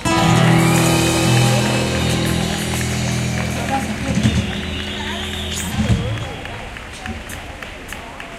20101023.ending.applause
ending guitar chord, followed by applause. Shure WL183 and Olympus LS10 recorder
live-music, field-recording, applause, concert, chord